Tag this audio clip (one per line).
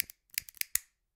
0
cigarrete
lighter
natural
sounds
vol